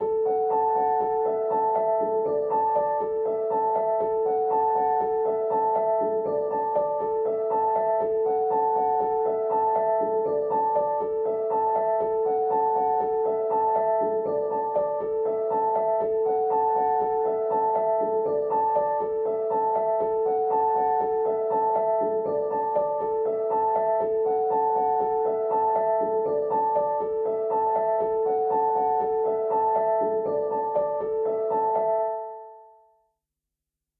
Piano loops 057 octave up short loop 120 bpm
pianomusic, music, samples, Piano, reverb, loop, simplesamples, free, bpm, 120bpm, 120, simple